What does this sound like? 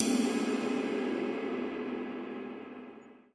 11 Ride Long Cymbals & Snares
bubinga, click, crash, custom, cymbal, cymbals, drum, drumset, hi-hat, metronome, one, one-shot, ride, shot, snare, sticks, turkish, wenge